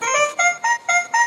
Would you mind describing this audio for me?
toy trumpet
Sound of a toy which i recorded in a toyshop using the mic on my phone. Chopped, cleaned and normalized in Adobe Audition.
lofi, toy, trumpet